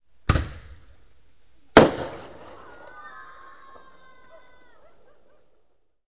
My neighbour launched some fireworks rockets and I recorded one shoot with my MP3 player.
crack, explosion, field-recording, fireworks, rocket, shoot, snap, tuzijatek